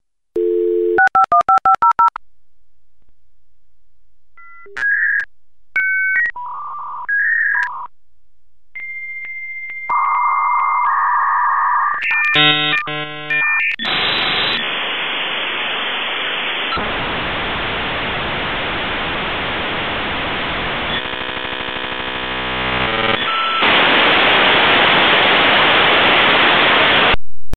A recording of a computer connecting to the internet with AOL using a dial up modem.
modem, aol, dial-up